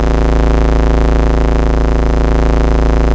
desktop sidefan notsure loop
A desktop fan, possibly with the electrical sound of the power supply caught as well. Recorded with an induction coil microphone.
field-recording, loop